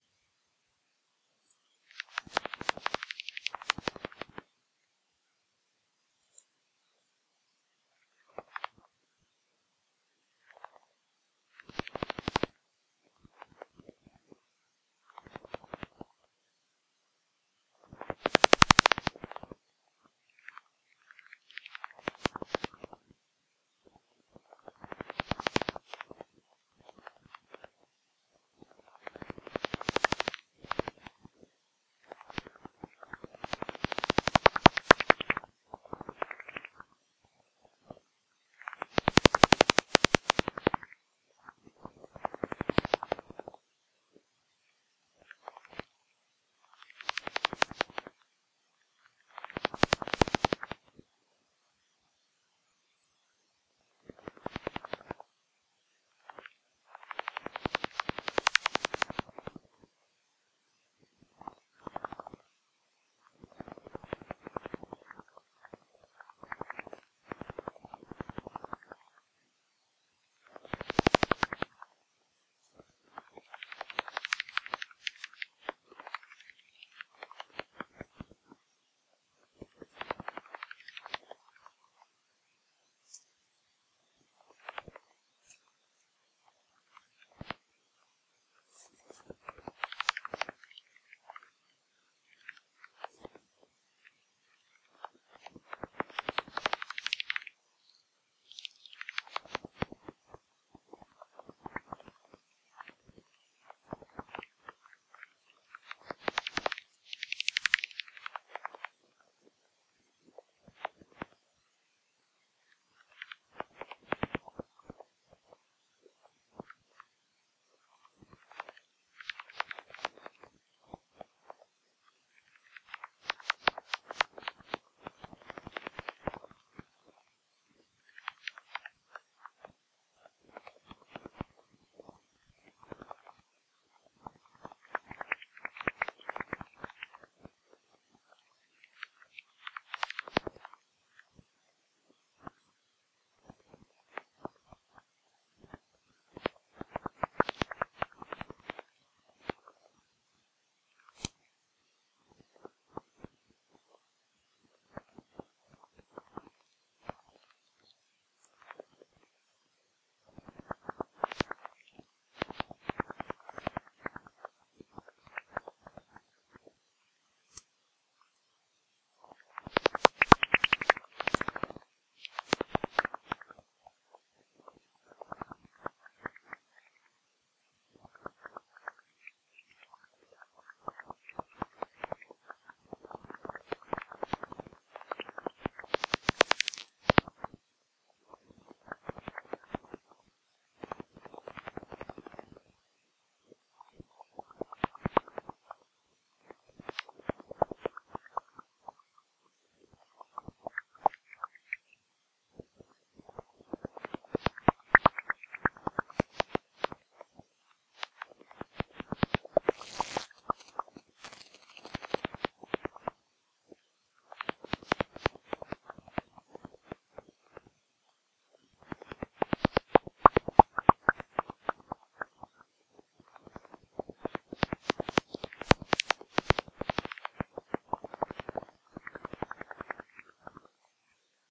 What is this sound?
Bats in Coldfall Wood
Bats recorded at 45 KHz.
Bats, Nature, location-recording